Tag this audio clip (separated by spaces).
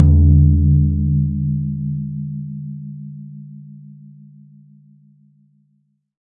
drum multisample velocity tom 1-shot